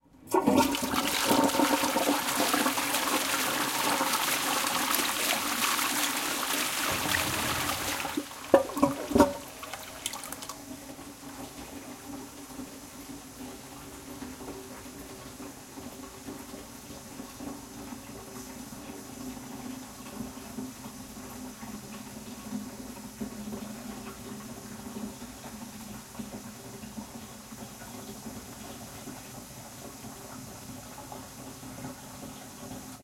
toilet flush
Flushing a toilet.
bathroom, flush, flushing, loo, restroom, toilet, toilet-flush, water, wc